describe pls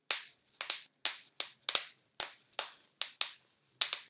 popping bag
Popping plastic bag
aplastar, bag, ballon, bolsa, pl, plastic, pop, popping, stico